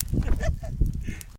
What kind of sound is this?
man laughing while fire burning
laughter, laugh, chuckle, man, fire-burning